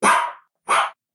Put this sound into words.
Dog bark
A rescue mutt named Bristol.